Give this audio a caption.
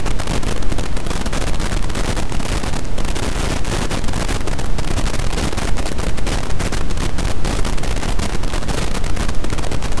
brown noise fm distortion
Brown noise generated with Cool Edit 96. Applied distortion.
mono, distortion